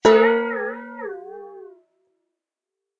Bowl With Water 2
A stereo recording of a stainless steel bowl that has some water inside it struck by hand. Rode Nt 4 > FEL battery pre amp > Zoom H2 line in.
boing, bowl, metallic, oscillation, percussion, stainless-steel, stereo, water, xy